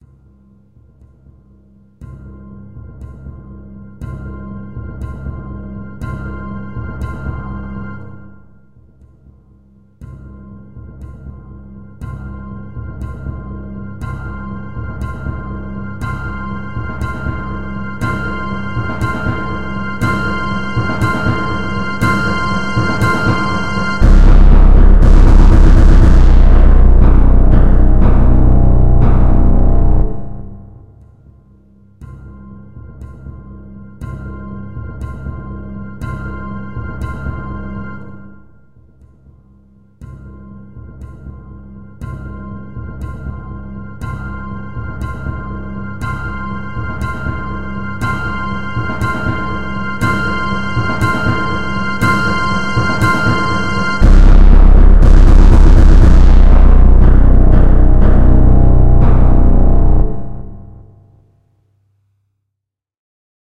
Futuristic High Tension
Intended for use in association with a film's soundtrack to aid in the creation of a sense of tension for the scenes in which it is used.
Created using a music notation software called Musescore. Edited using Mixcraft 5.
Synth, Tension, Music-Beds